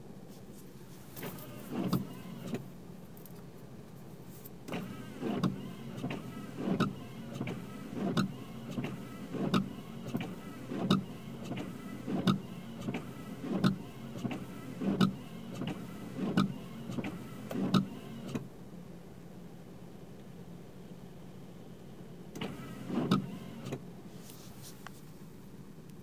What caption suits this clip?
Windshield wipers in the rain at night.
driving, rain, squeak, water, windshield, wipers